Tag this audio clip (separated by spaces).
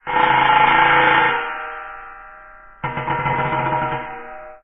clang drum-roll experimental metallic percussion pitchshift